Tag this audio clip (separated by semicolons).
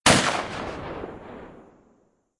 trenches; warfare